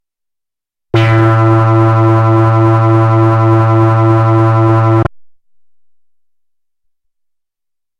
SW-PB-bass1-A2
This is the first of five multi-sampled Little Phatty's bass sounds.